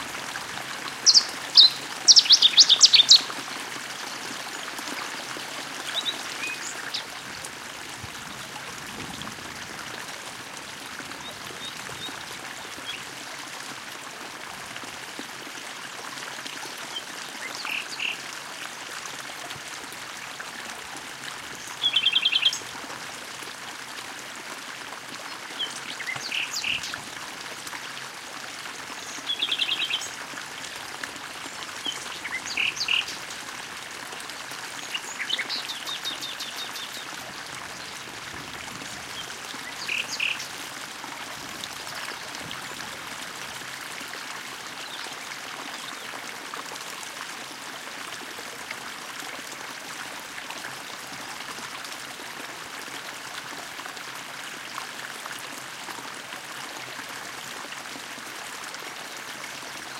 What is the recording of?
20070504.Sierra.stream.04
A stream in Sierra Morena (S Spain), bird calls in background
field-recording, nature, water